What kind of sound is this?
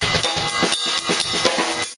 drum sample 1
drum, bell, punk, metal, jamming